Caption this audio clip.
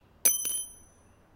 Metal nail falling: The sound of a single long metal nail being dropped and impacting a hard ground, impact sounds. This sound was recorded with a ZOOM H6 recorder and a RODE NTG-2 Shotgun mic. No post-processing was added to the sound. This sound was recorded by someone dropping one long metal nail onto a hard ground on a quiet, sunny day, while being recorded by a shotgun mic.